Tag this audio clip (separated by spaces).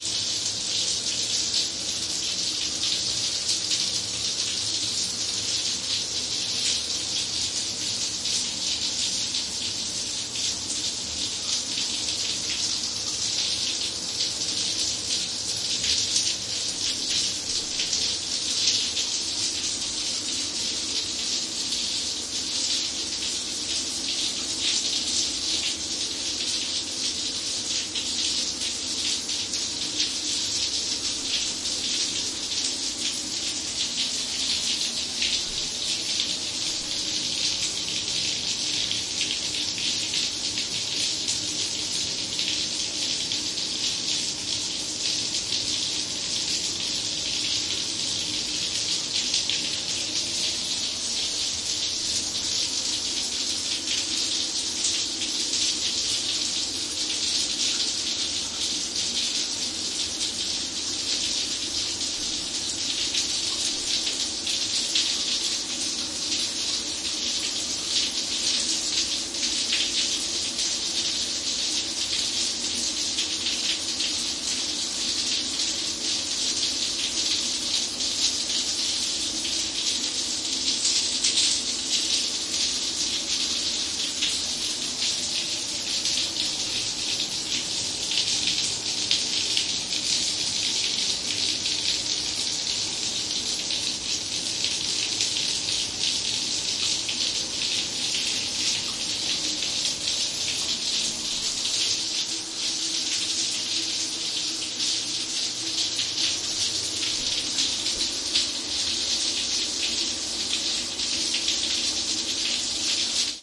binaural primo-em172 shower zoom-h2n